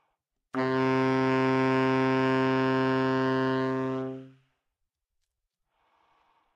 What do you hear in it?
Part of the Good-sounds dataset of monophonic instrumental sounds.
instrument::sax_tenor
note::C
octave::3
midi note::36
good-sounds-id::4969